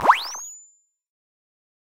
This is sound of something like turn on radio device. Can use in games and in other stuff. Made in FL studios.
turn, noise, radio, computer, activate